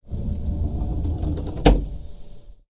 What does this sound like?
SOUND - Computer Desk Keyboard Tray - Moving and locking RUMBLE
Keyboard tray on large wooden desk moving in and locking into place.
Sliding of tray rollers, snap of tray into stow-position
Very deep and rumbling.
snap, wood, loop, desk, pop, click, deep, field-recording, bass, rumble